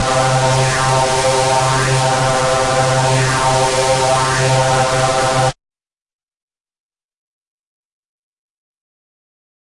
multisampled Reese made with Massive+Cyanphase Vdist+various other stuff
processed, distorted, reese, hard